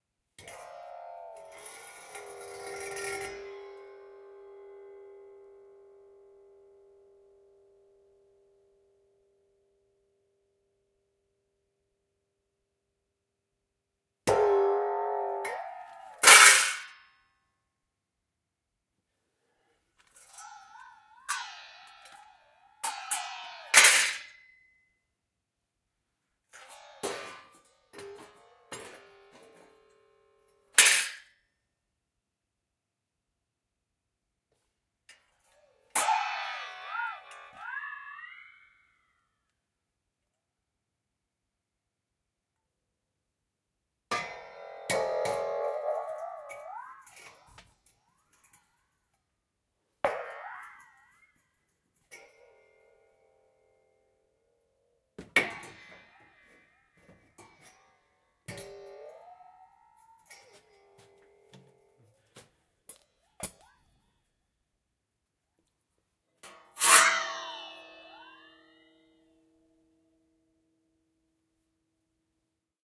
Two Man Saw - Manipulations 3
1.5 meter long crosscut two-man saw with wooden handles being bent, scratched or dropped using various tail alterations and manipulations. Occasional disturbance in the left channel due to unexpected recording equipment issues.